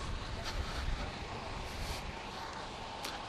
A loop of the terminal before boarding the Cape May-Lewes Ferry heading south recorded with DS-40 and edited in Wavosaur.